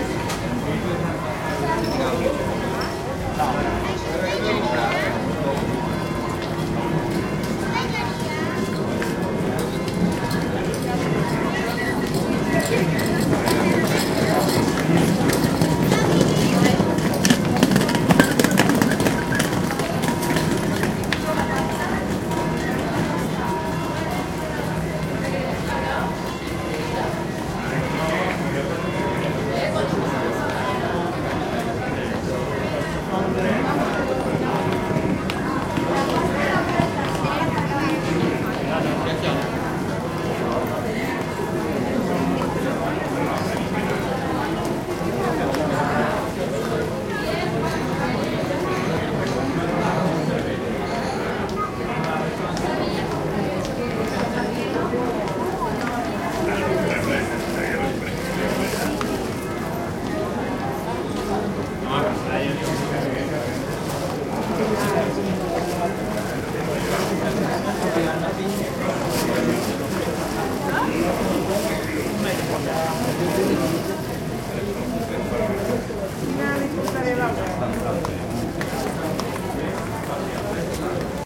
120731 Venice AT Street 1 F 4824
A street teeming with tourists in the historic center of Venice, Italy.
Featuring lots of people speaking all kinds of languages, walking by, etc.
These are some recordings I did on a trip to Venice with my Zoom H2, set to 90° dispersion.
They are also available as surround recordings (4ch, with the rear channals at 120° dispersion) Just send me a message if you want them. They're just as free as these stereo versions.
mediterranian, field-recording, noisy, Italy, urban, Venezia, busy, Venice, city, people, atmo, bustling, tourist